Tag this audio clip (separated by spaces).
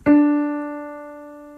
D Piano